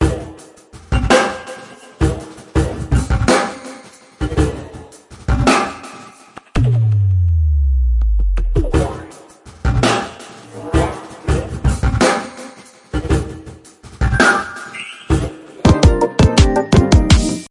pew pew boom bap then chords + drum fill